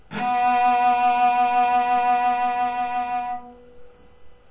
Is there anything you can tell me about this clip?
A real cello playing the note, B3 (3rd octave on a keyboard). Twelfth note in a C chromatic scale. All notes in the scale are available in this pack. Notes played by a real cello can be used in editing software to make your own music.
There are some rattles and background noise. I'm still trying to work out how to get the best recording sound quality.